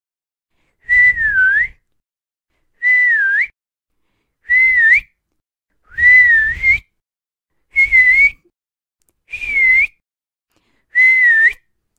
Attention Whistle
Sharp whistle to get someone's attention
whistle, female, attention, vocal, voice, human